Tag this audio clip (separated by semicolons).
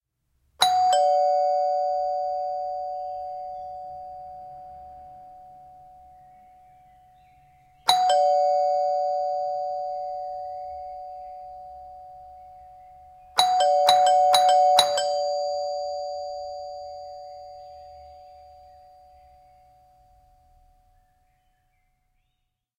doorbell; bell; ringing; door